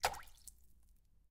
Variations about sounds of water.
drop, liquid, SFX, splash, water